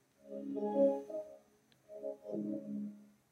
TV on and off